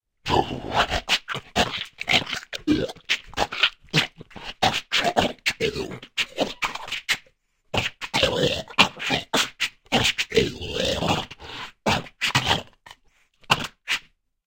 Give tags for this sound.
appetentia beast bites commons creative creature creepy cruenta free gore helldog hellhound horror lazaro lycanthrope mara miguel monster royalty scary spooky werewolf wolfman

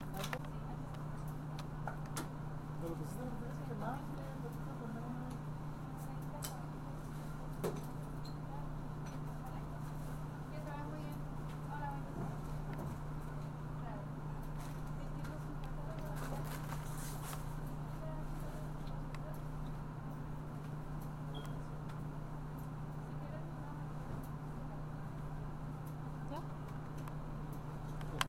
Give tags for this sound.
ambience city night

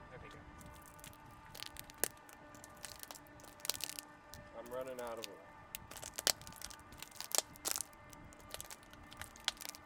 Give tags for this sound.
narrative sound

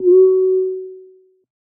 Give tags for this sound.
sound beat jungle audio sfx fx vicces pc effext game

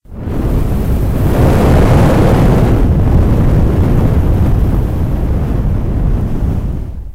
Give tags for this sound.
fireplace burner burn flames combustion flame fire burning

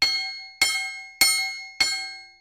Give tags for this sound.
anvil clank percussion clink

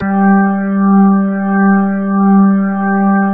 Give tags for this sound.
organ rock sample sound

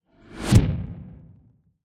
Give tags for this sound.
Sweep Whoosh

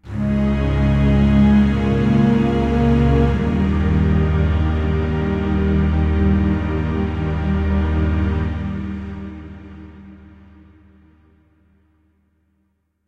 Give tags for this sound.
ambience ambient atmosphere background chord cinematic dark drama dramatic film instrument instrumental interlude jingle loop mood movie music outro pad radio scary soundscape spooky suspense thrill trailer

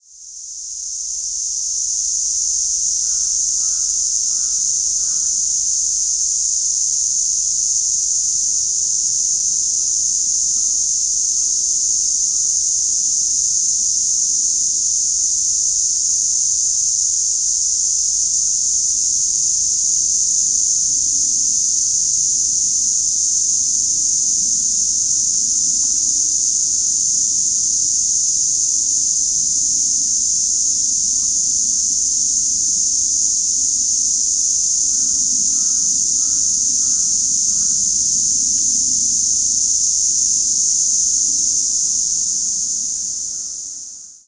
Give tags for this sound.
field-recording wooded-area japan roland-r-05 sapporo jet-airplane nature geimori cicadas hokkaido

